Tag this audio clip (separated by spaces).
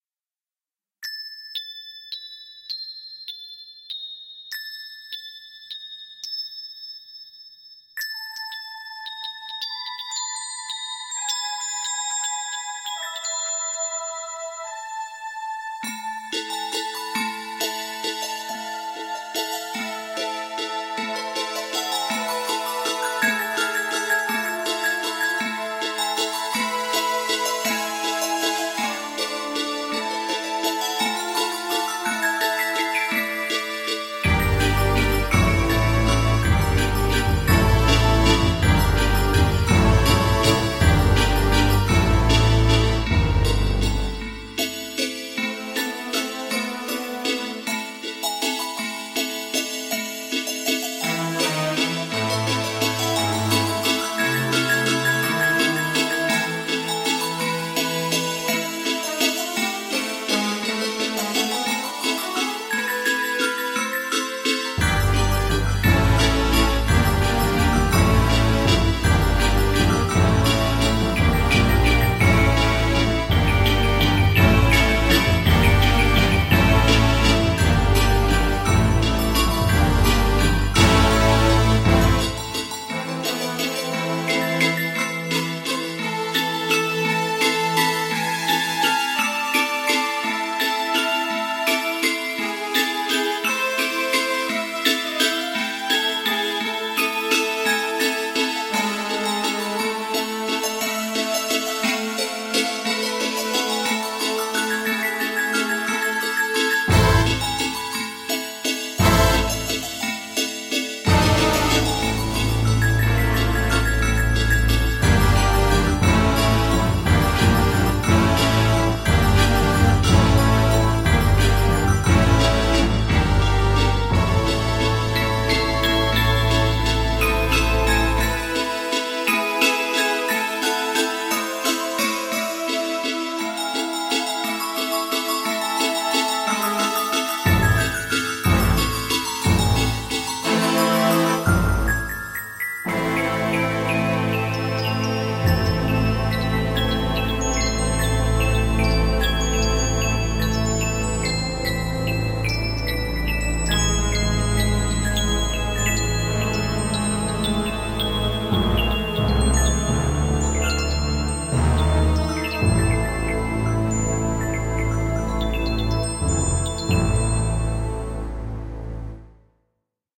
Modern score Soundtracks Classical Movie Ambient Games Gothic Instrumental